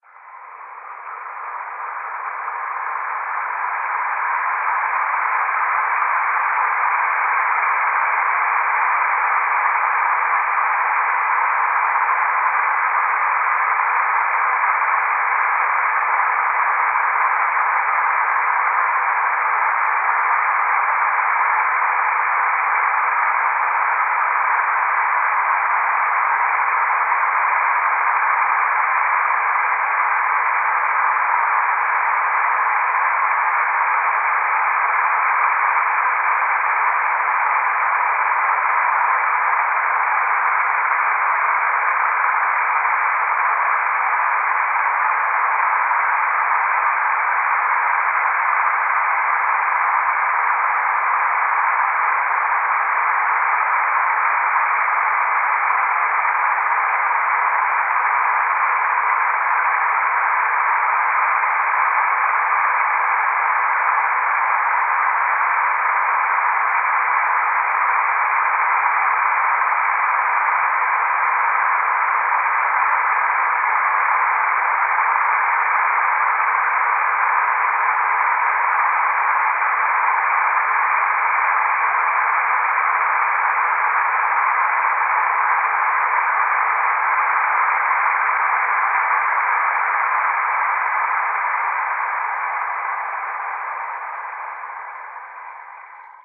A distant fountain sound. Rendered in PureData, with a convolution in Fscape with some creatively filtered noise.
DCSS, Distant